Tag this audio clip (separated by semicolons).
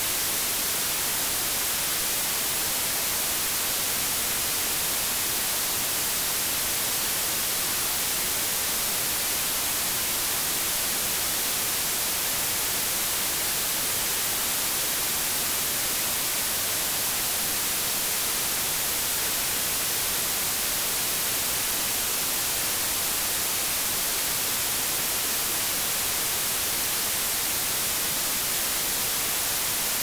30; WHITE